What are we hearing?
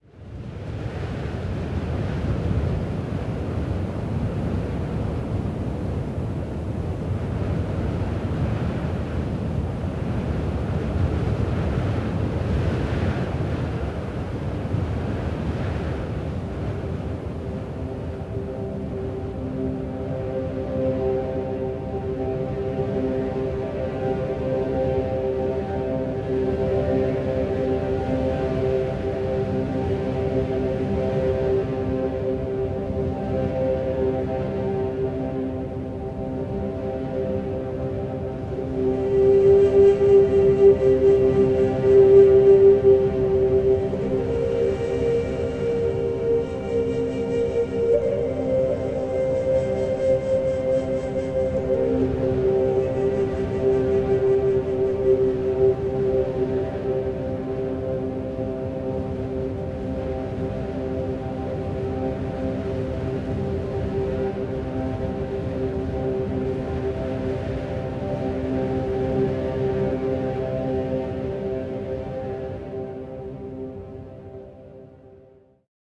This is for a play in a scene high up in the sky. A shaman calls on the North Wind to help him show another man the truth about himself. The mood is meditative.